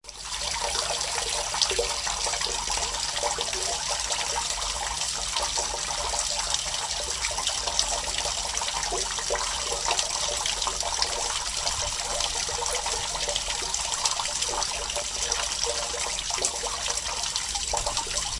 Rain in Drain Pipe Gutter 1
dripping, drain, drips, rain, gutter, drainpipe, water, weather, drip, wet, raining, drops, raindrops